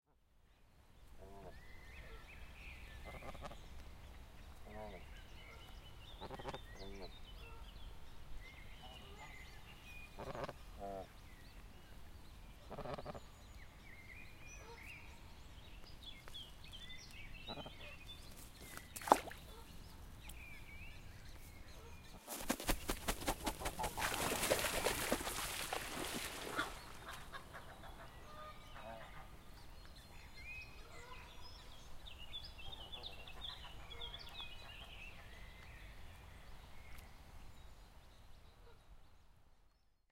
110505-002 snow geese 1
Snow geese [Chen caerulescens] gabbling, jumping into the water and starting to fly away from the shore of a lake in Neuss, Germany. Zoom H4n